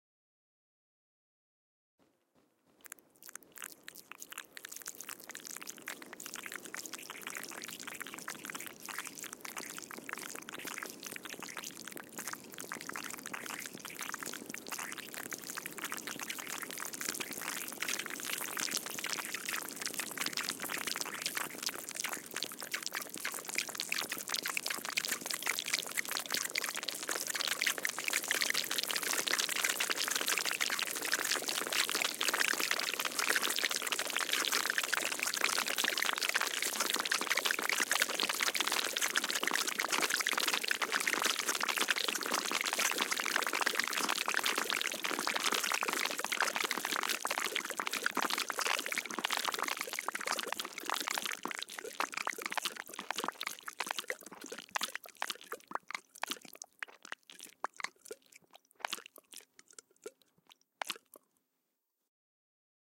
smeks1 cleaned ms1-50-80-100
Short processed sample of mouth-sounds, in Dutch 'smeks'